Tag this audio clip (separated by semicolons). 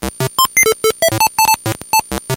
big; c64; chiptunes; drums; glitch; kitchen; little; lsdj; me; melody; my; nanoloop; sounds; table; today